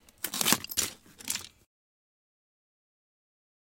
Screw In Bag-22
some foley I recorded for a game jam. recorded by rummaging around in a bag full of screws and bolts. could be useful for some gun sounds, or maybe something totally different Check out the rest of the pack for similar sounds
bits, bolt, foley, gun, gun-bolt, metalic, parts, rummage, scrap, screw